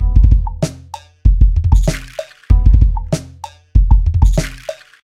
Weird Drum Loop
Bpm: 96